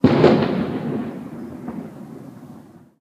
A distant firework sound amplified using Audacity. Location: The Castle, Neutral Bay, close to the wharf, Sydney, Australia, 15/04/2017, 16:56 - 21:11.